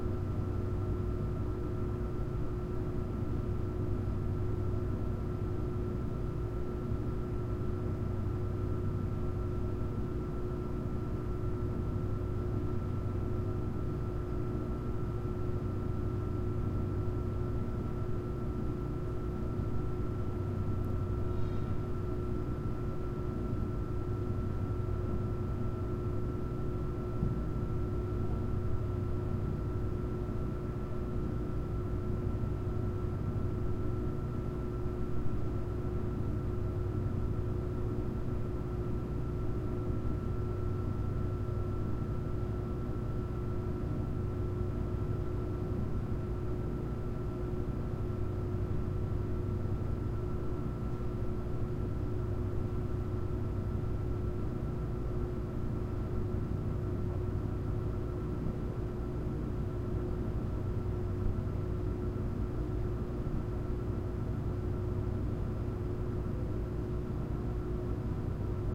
room tone large room church basement heavy deep ventilation or furnace hum
ventilation, hum, or, room